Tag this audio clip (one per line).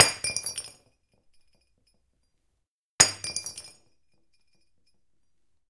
breaking
glass
glasses